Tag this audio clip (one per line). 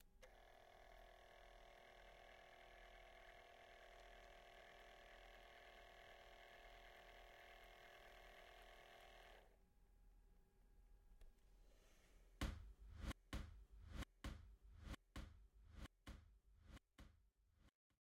handheld
print
printer